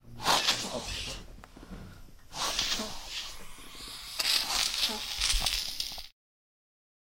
two short cough's, then the sound of phlegm being vacumed from tracheostomy (breathing hole). recorded in a hospital room in Israel using an AT 835B shotgun and tascam dr60.
tracheostomy, phlegm, Tracheotomy